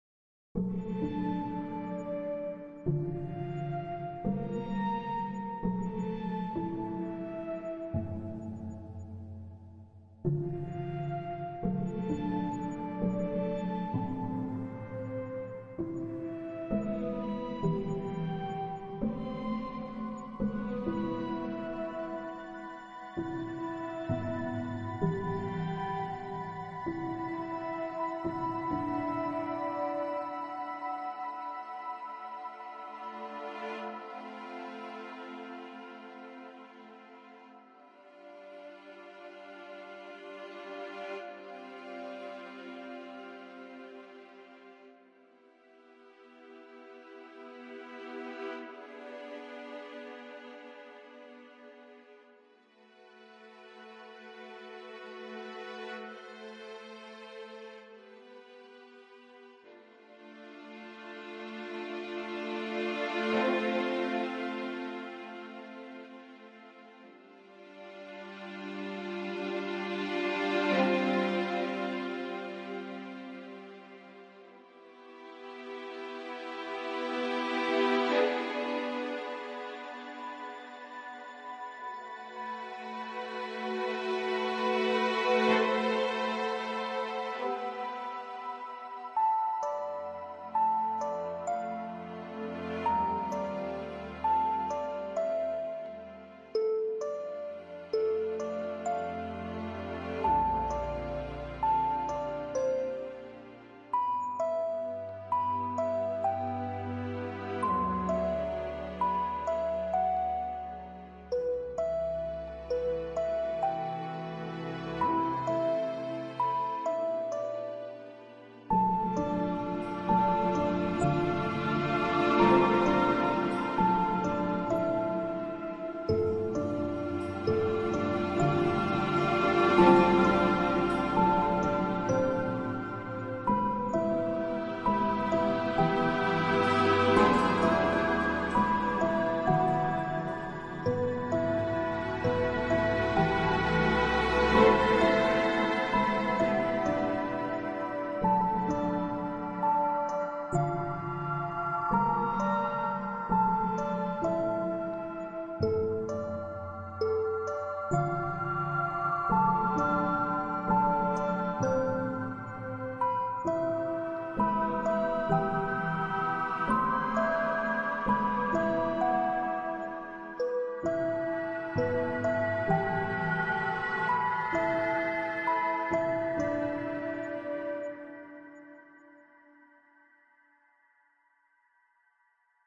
Emotional Original Soundtrack - FORGIVENESS
adventure, ambient, atmospheric, Beautiful, Emotional, Epic, fantasy, film, movie, music-box, piano, soundtrack, strings, theme